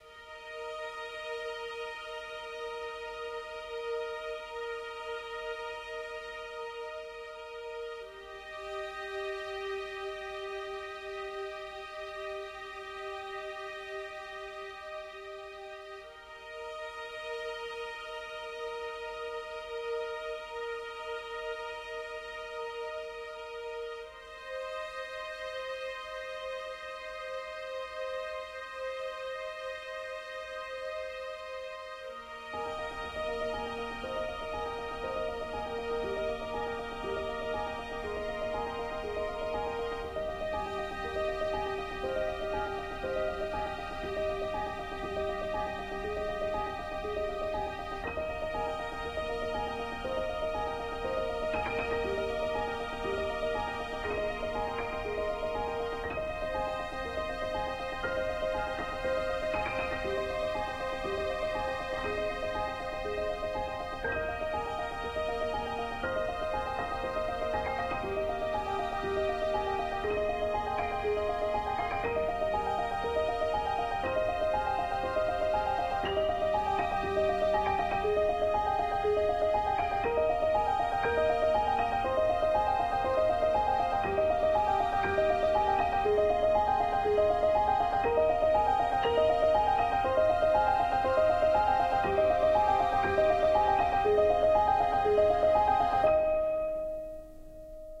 Calming Background Music Orchestra
Genre: Calming, Orchestra
This one is old and unfinished.
piano, music, background, orchestra, string, relaxing, calming